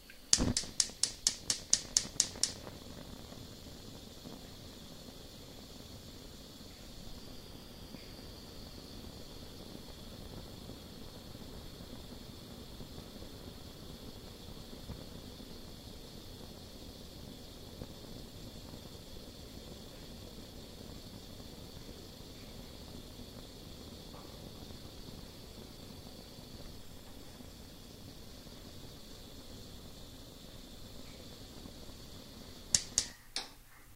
I turned on the gas stove and recorded the flames as they flickered.
fire, gas, stove